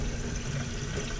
Drain Loop 1
This stereo looping sound is from my Bathroom Sink Drain sound file. It captures 1 second worth of sound before the loud, high pitched squealing noise.
bathroom, drain, loop, running, sink, water